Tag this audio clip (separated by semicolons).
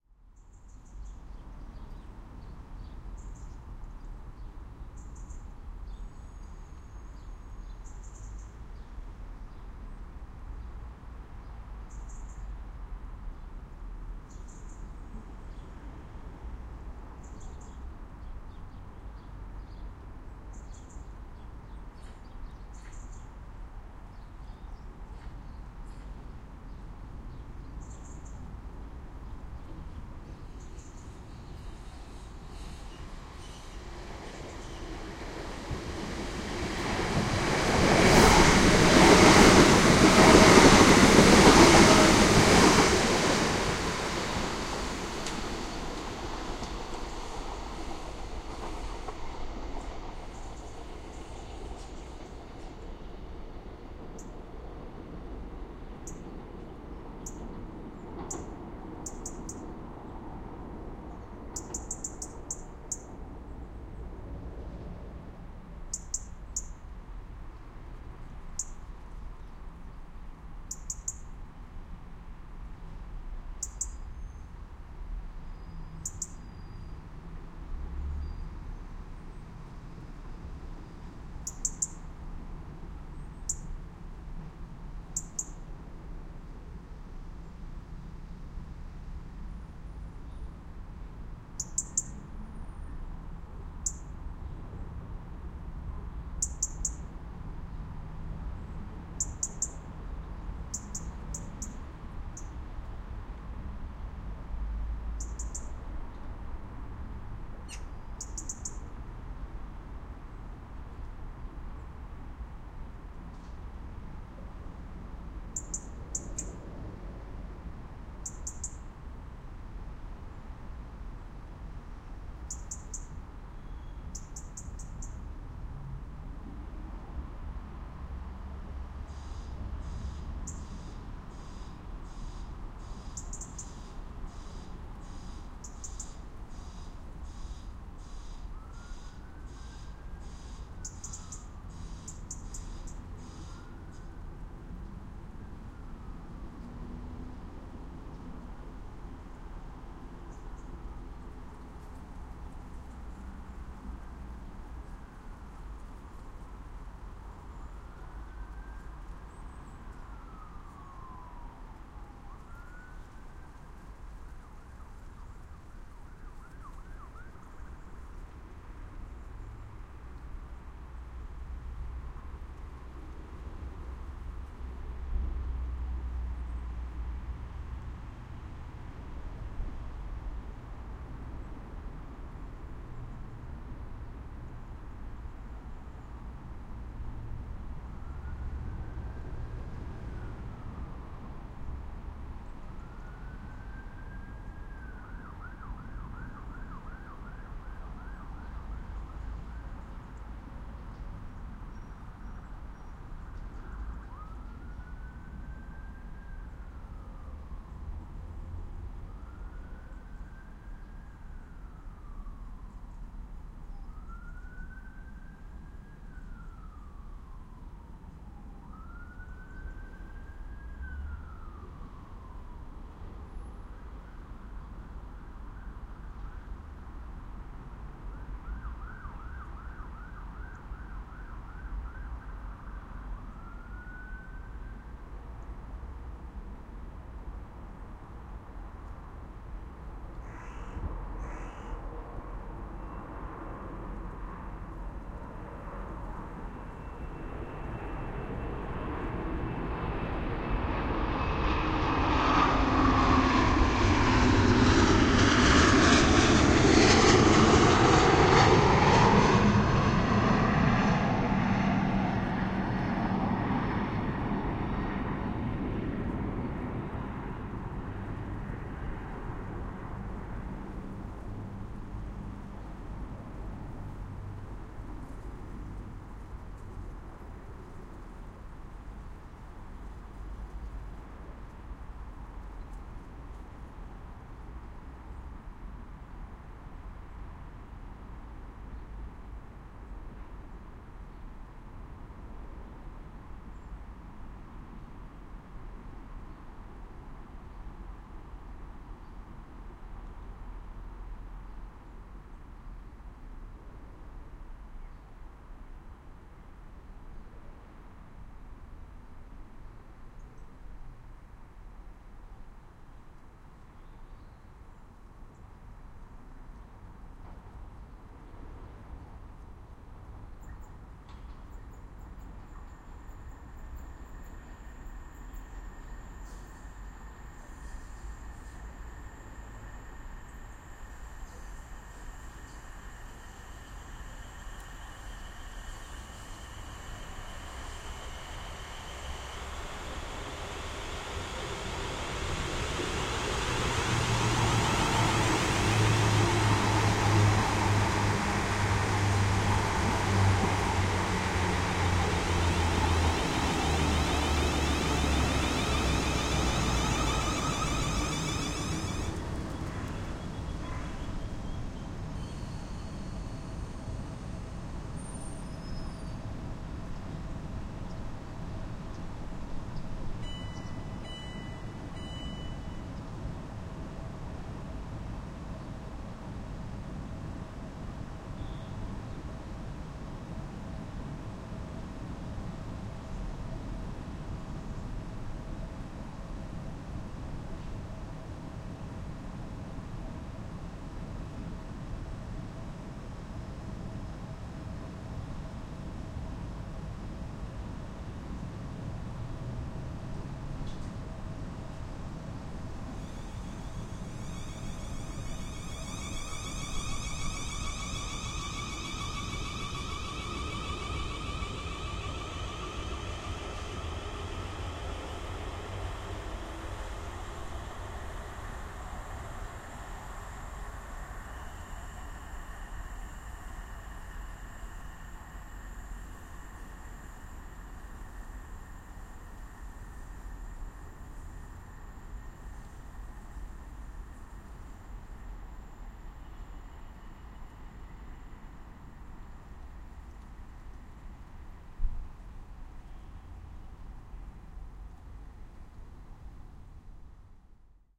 england; platform; plane; train; station; bitterne; railway